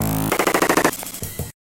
first experiment with LiveCut beta 0.8the new "Live BreakBeat Cutting tool"from mdsp @ Smartelectronix.. 4 bar jazz break treated with the warp mode(pt 1 of 2)
glitch, breakbeat